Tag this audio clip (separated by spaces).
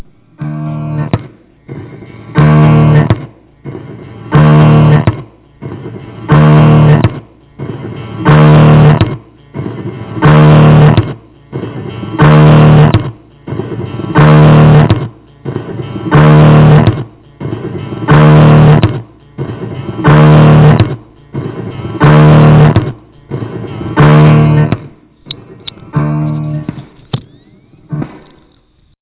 distorted skip record skipping